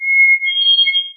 6204 NoiseCollector HORROD07 remixed2
Remix with heavy processing. Pitch Change after an unusual use of noise removal.
remix, synthesis